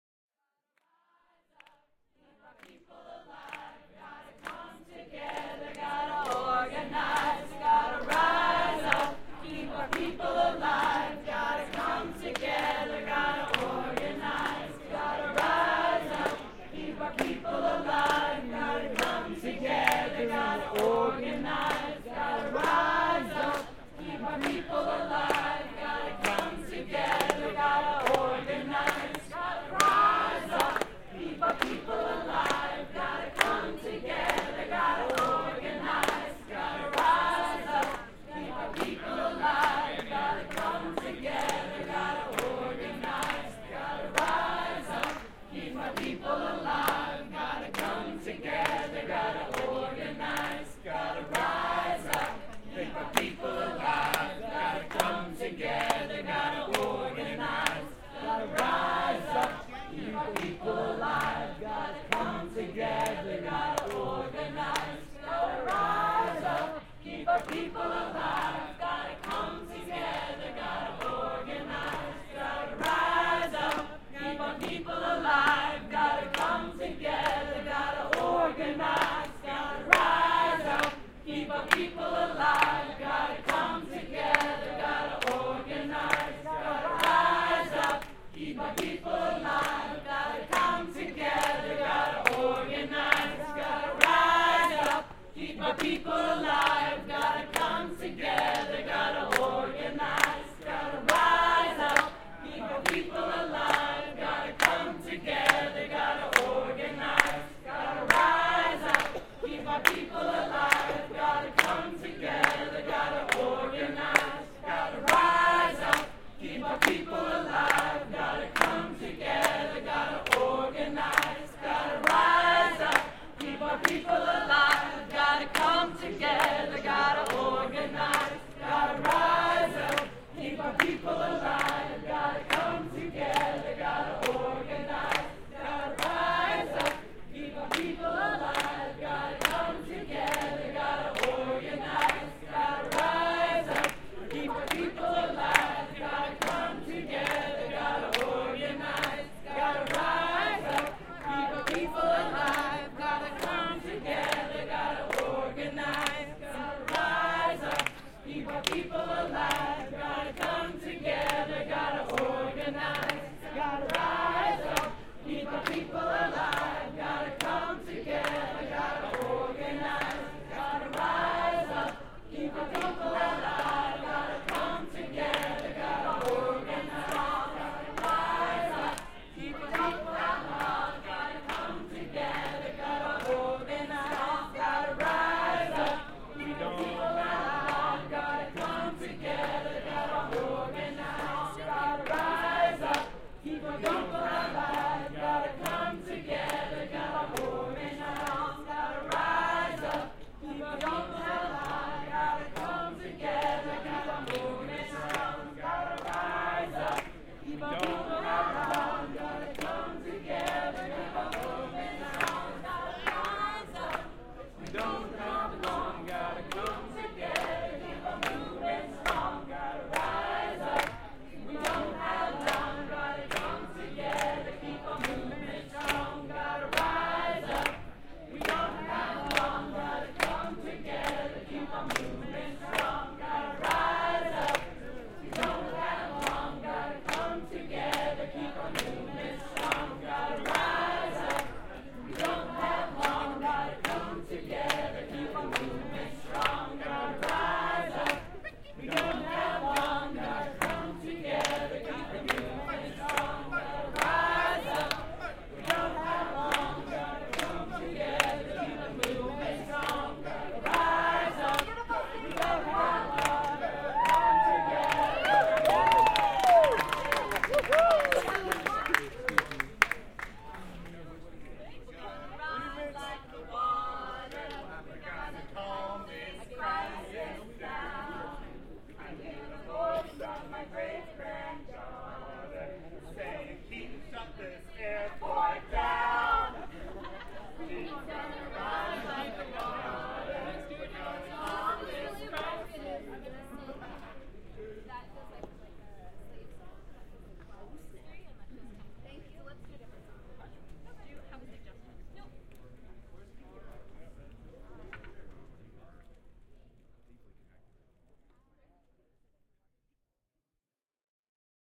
Rise Up: Sea-Tac Airport Travel Ban/Immigration Protest
"We've got to rise up, keep our people alive, gotta come together..."
Field/protest recording
Sea-Tac Airport (Seattle, WA, USA)
Immigration/Travel Ban Protest
1/28/2017?
This first recording comes from a bit later in the evening, people had been demonstrating in various ways for hours.
If anyone has pointers for the origins of this song/chant, I would love to learn more.
I will note that after this song, there was some discussion about appropriation of chants/protest music. I don't think it was about this song presented in the bulk of this recording, but one that was briefly sung after. I kept in a snippet at the end where someone can be heard suggesting a different song for this reason.
Source:
DPA 4060 mics (used as binaural) -> Sound Devices 702
song, chant